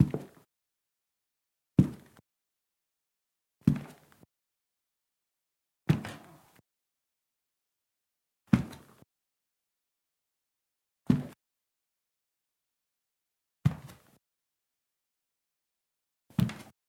Heavy Footsteps on Staircase Landing Wearing Brogues
Recording of me walking heavily on a staircase landing whilst wearing brogues.
Low frequency thumping against carpeted wooden floorboards.
Recorded with a Zoom H4N Pro field recorder.
Corrective Eq performed.
This could be used for the action the sound suggests, or for someone walking over other instances of carpeted wooden floors.
walking, footstep, brogues, wood, heavy, wooden, footsteps, staircase-landing, carpet, carpeted, dress-shoes, staircase